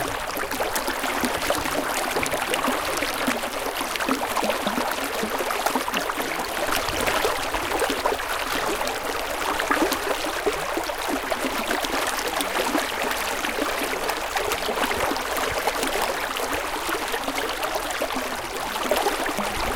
Sounds of rural area - river 1
| - Description - |
Water flowing down a small river
water, stream, flow, creek, river, brook, liquid, relaxing